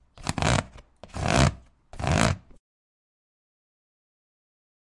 scratching rubber
field-recording, OWI, Rubber